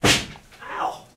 hit ouch
A somewhat distant indoors hit and a male ouch following it.
Sennheiser ME64 into a Tascam DR-70D